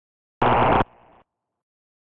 I used FL Studio 11 to create this effect, I filter the sound with Gross Beat plugins.
computer, digital, electric, freaky, future, fx, lo-fi, robotic, sfx, sound-design, sound-effect